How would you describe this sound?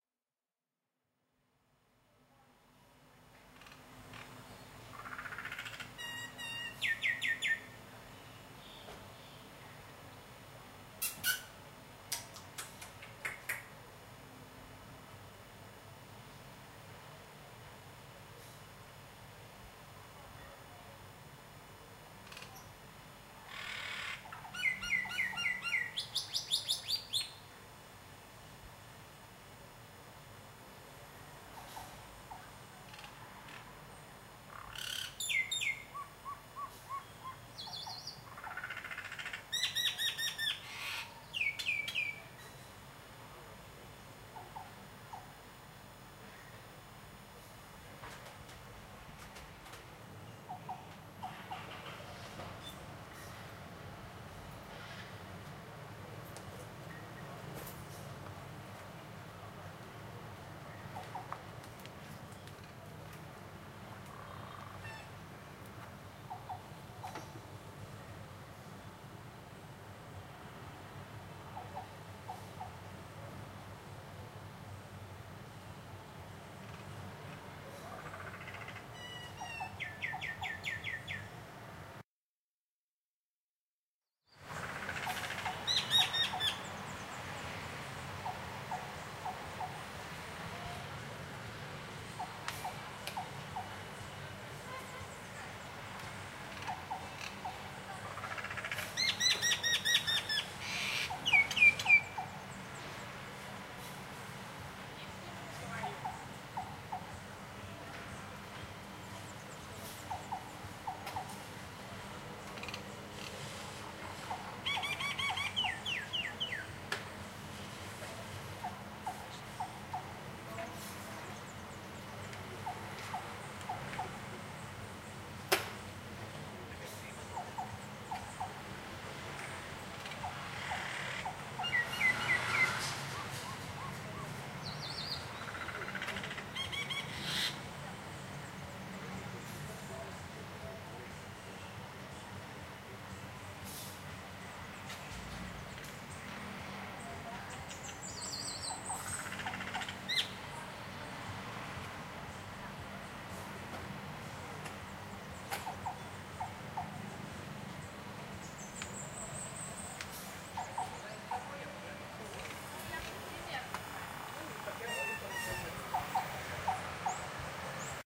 Birds Atmosphere Thailand 2012
thailand, ambience, birds, atmosphere